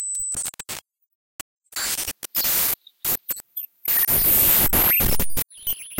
Results from running randomly-generated neural networks (all weights in neuron connections start random and then slowly drift when generating). The reason could be input compression needed for network to actually work. Each sound channel is an output from two separate neurons in the network. Each sample in this pack is generated by a separate network, as they wasn’t saved anywhere after they produce a thing. Global parameters (output compression, neuron count, drift rate etc.) aren’t the same from sample to sample, too.
glitch, lo-fi, digital, noise, harsh, neural-network, random